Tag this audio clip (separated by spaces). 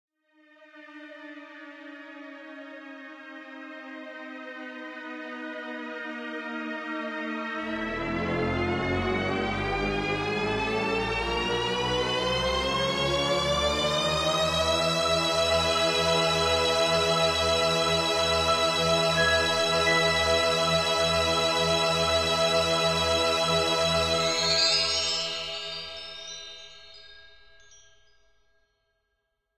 cinematic compositing movie start film